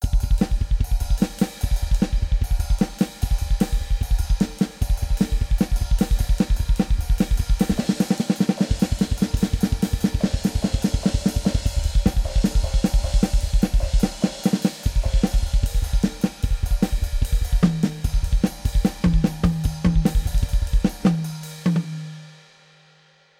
Crazy Metal Drummer
A short drummer session.